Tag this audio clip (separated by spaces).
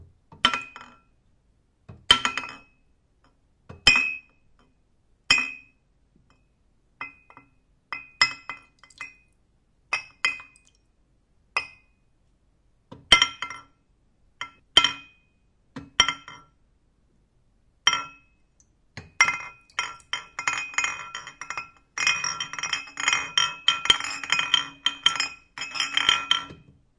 aqua bottle collision glass liquid spill submerged water